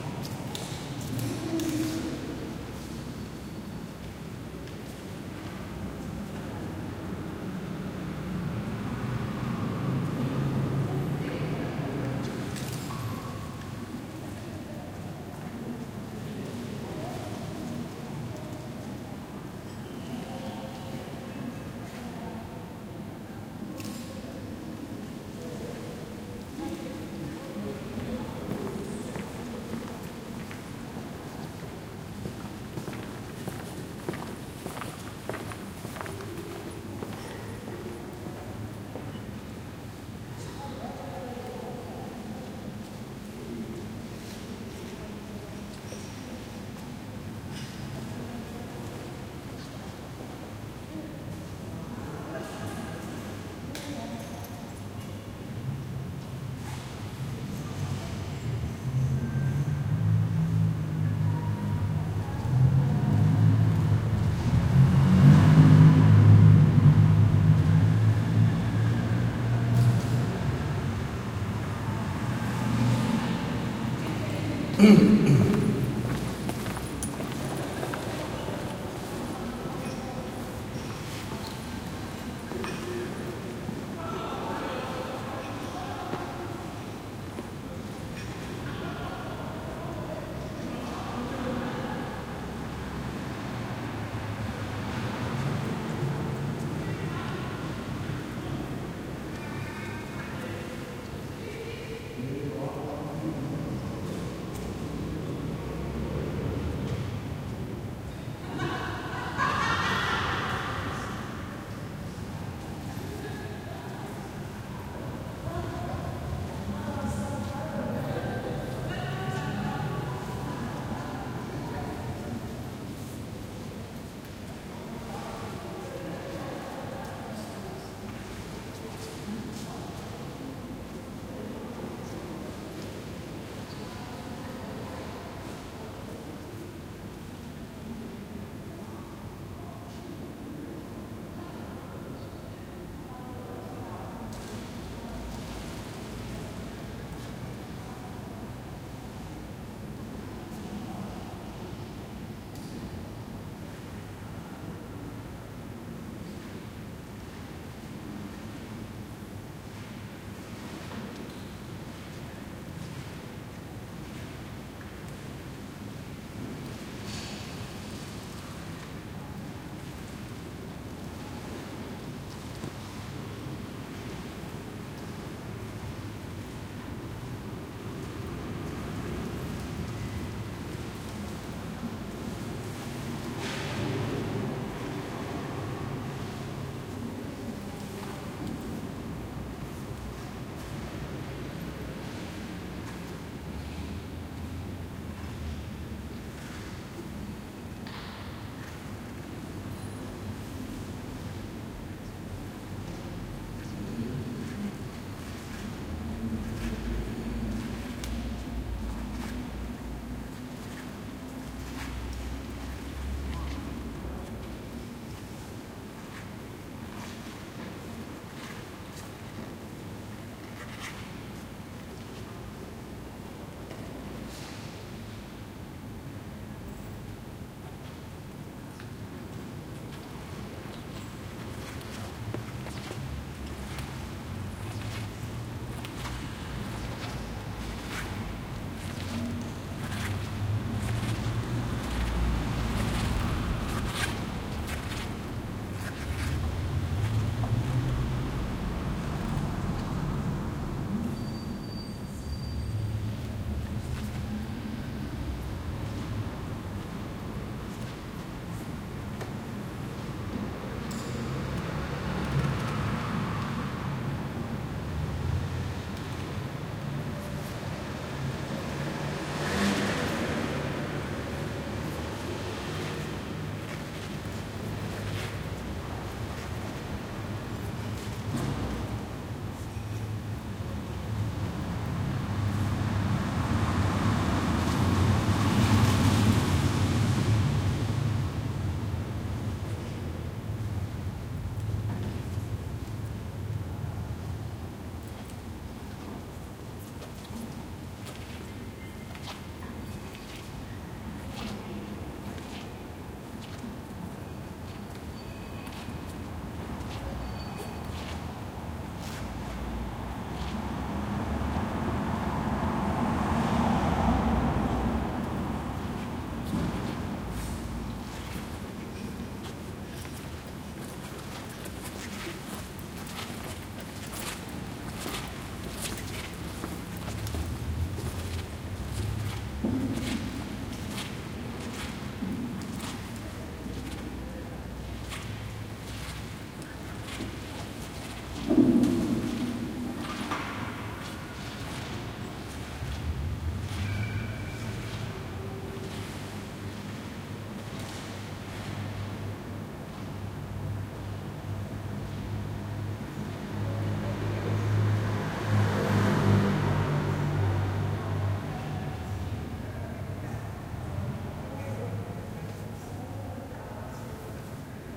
Sitting on a bench inside the church of Santa Maria Dell’Anima, listening to the few people walking through and the occasional car or motorbike outside. Recorded with a Zoom H4 on 12 June 2008 in Rome, Italy.
anima, church, dell, field-recording, footsteps, italy, maria, murmur, rome, santa, traffic